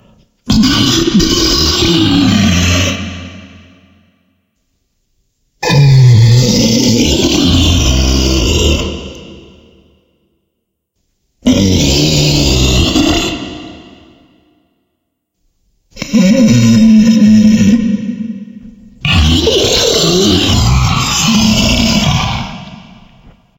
here is a few greatly disturbing monster sounds I recorded :)
Recorded with Sony HDR PJ260V then edited with Audacity
beast, beasts, big, creature, creepy, growl, growls, horror, huge, monster, noise, noises, scary, sounds